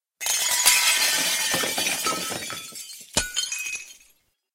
Crockery Crash
Recorded with an Edirol R-09, with several broken and unbroken plates for a couple of sound effects in Tom Stoppard's play "On The Razzle". Multi-tracked the recordings in Audition to give it a bit more 'oomph'.
crashing-crockery, plates-breaking, porcelain-breaking